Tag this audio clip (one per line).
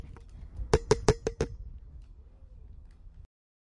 Sibelius
monument
Helsinki
tapping